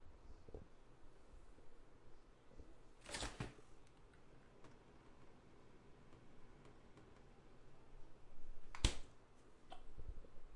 Fridge Opening
The sound of a fridge door opening and closing.